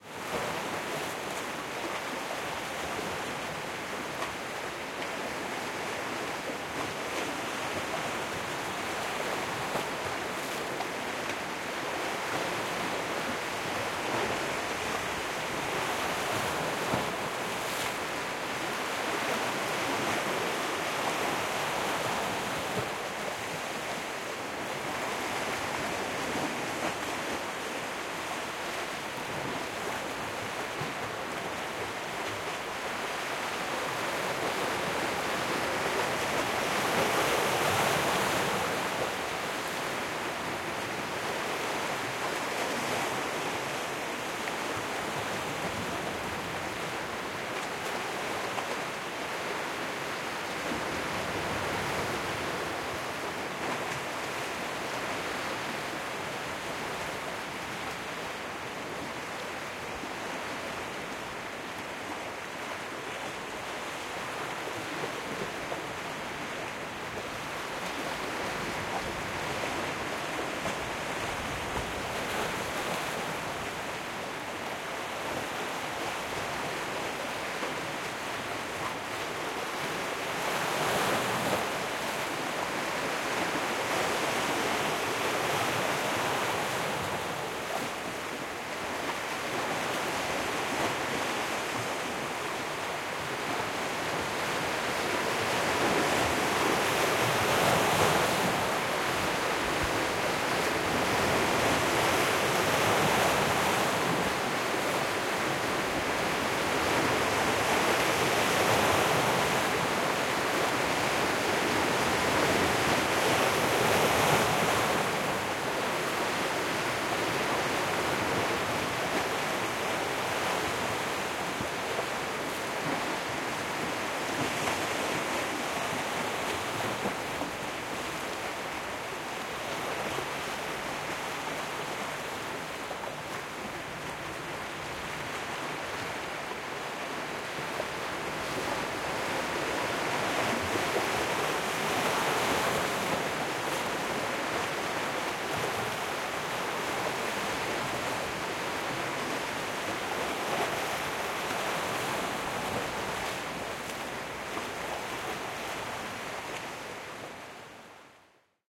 Waves crashing on the shore in the mediterranean sea, wide angle
Wide angle recording of waves crashing against the shore outside Genoa, Italy.
italy, mediterranean, waves, ocean